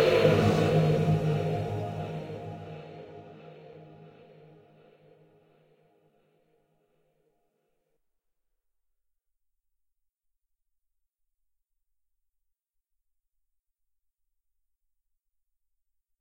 GUI Sound Effects 070
GUI Sound Effects
Design, Menu, Game, GUI, Sound, SFX, Interface, Effects